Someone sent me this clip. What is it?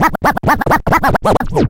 Scratching a vocal phrase (fast). Technics SL1210 MkII. Recorded with M-Audio MicroTrack2496.
you can support me by sending me some money:
dj, battle, vocal, scratching, scratch, record, vinyl, stab, riff, fast, turntablism, phrase, chop, beat, hiphop, cut